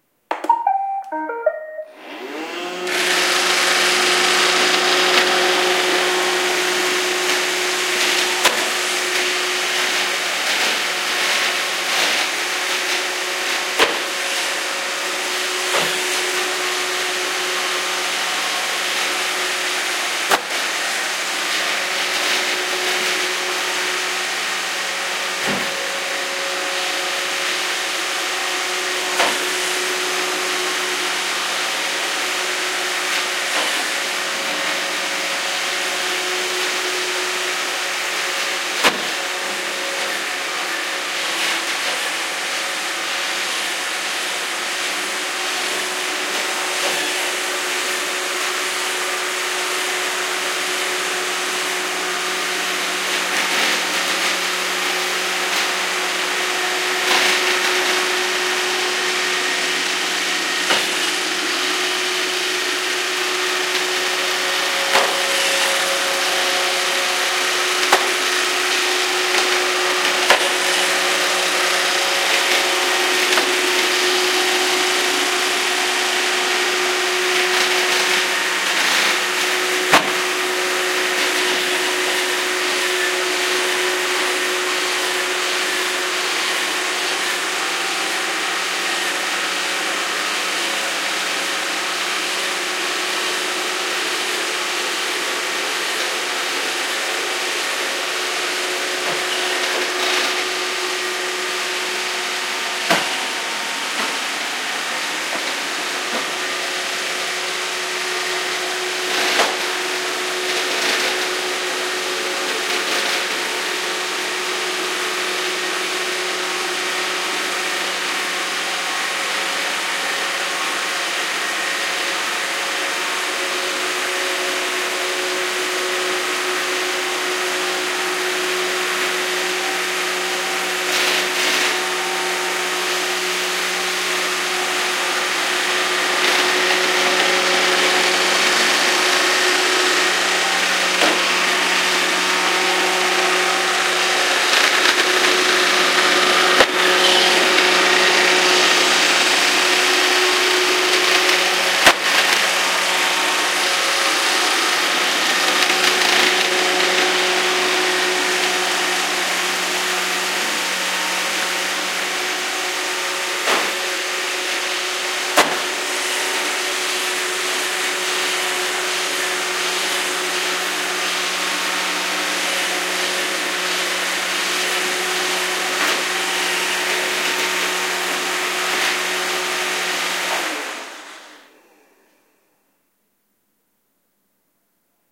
A robot vacuum (iRobot Roomba 660 ®) moving around a 4x3 m room. Sennheiser MKH60 + MKH30 into Shure FP24 preamplifier, PCM M10 recorder. Decoded to Mid-side stereo with free Voxengo VSt plugin, and normalized.